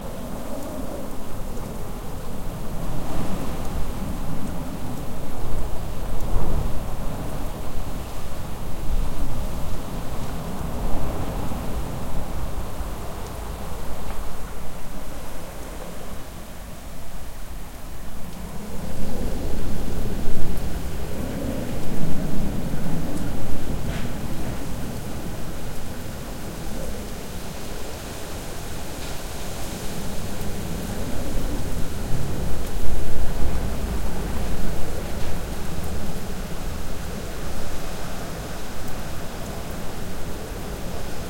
storm coming2
field-recording, heavy, rain, storm, weather, wind